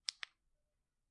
Ipad click
Click of an Ipad